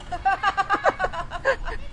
people laughing outdoors 002
women laughing outdoors
female, field-recording, funny, giggle, jolly, laugh, laughing, laughter, outdoors, people, voice, woman, women